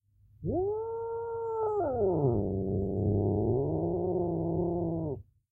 Cat Howl/Growl (2)
A hasty recording of a cat growling/howling at a rival for territory.
cats, growls, howl, animals, pet, growl, pets, growling, angry, cat, feline, animal